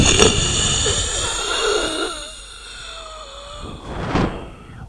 zmb2 dead
This is a my improvisation with microphone
zombie, dead, dead-zombie